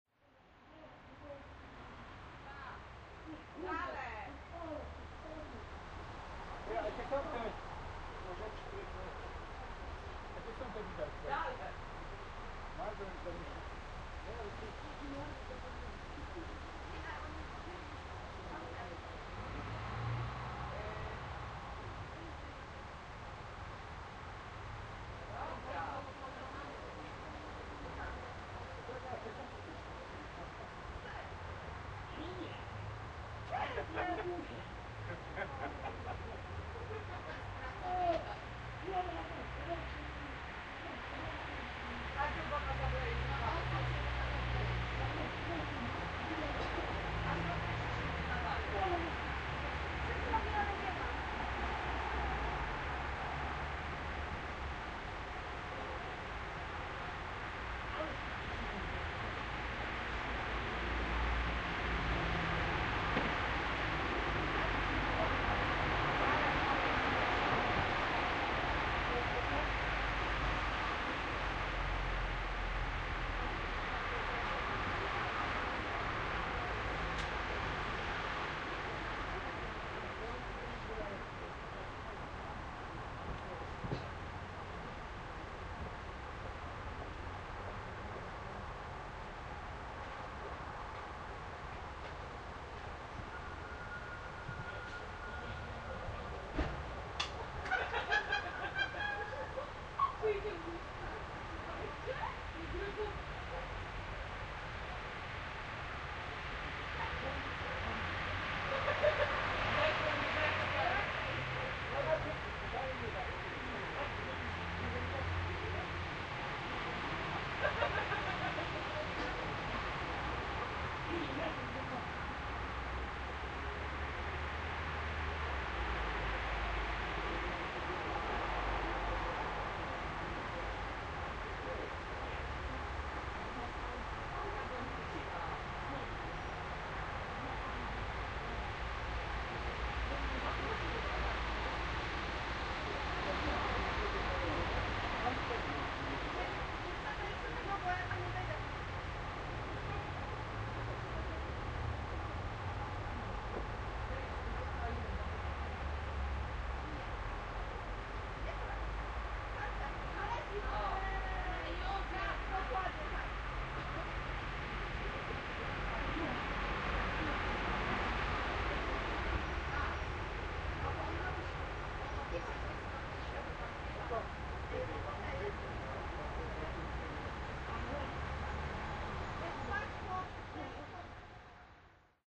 candle merchants 011113
1.11.2013: about 2013. Voices of candle marchants in All Saints Day near of cementery on Gorczyn (Poznan/Poland).
all-saints-day, cemetery, field-recording, poland, poznan, voices